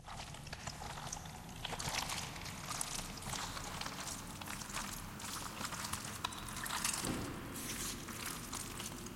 STE-008 Edit
Sounds like person walking through sewer
sound, field-recording